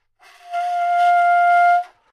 Sax Soprano - F5 - bad-richness bad-timbre
Part of the Good-sounds dataset of monophonic instrumental sounds.
instrument::sax_soprano
note::F
octave::5
midi note::65
good-sounds-id::5861
Intentionally played as an example of bad-richness bad-timbre
F5, multisample, good-sounds, sax, single-note, neumann-U87, soprano